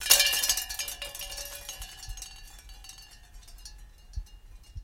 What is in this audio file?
This is recorded from windchimes, it`s a almost 2 meter long string with small, different sized, plastic like bowls on it. I recorded it hung up on the wall, because i needed it to sound more percussive.